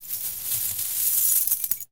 Coins Pouring 09
A simple coin sound useful for creating a nice tactile experience when picking up coins, purchasing, selling, ect.
sfx, Purchase, Game, indiedev, Sell, indiegamedev, Coin, games, gaming, Coins, gamedeveloping, Realistic, videogame, Currency, gamedev, Money, Gold, Video-Game, videogames